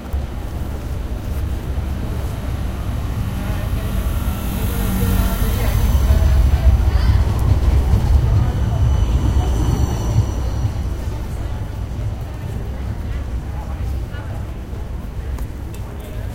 tram cars rolling slowly (actually this bizarre Sevillian tram marches so slowly that walking people often overtakes it). Edirol R09 internal mics
field-recording, slowness, tram, rumble, sevilla